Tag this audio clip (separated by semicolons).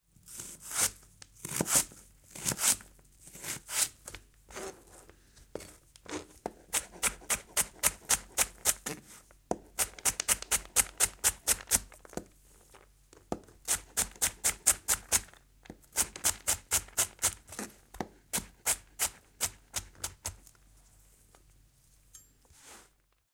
chef; EM172; LM49990; Primo; vegetables